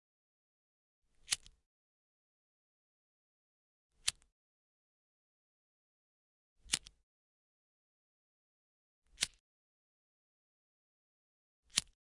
12 - Lighter strike
Panska, Pansk, CZ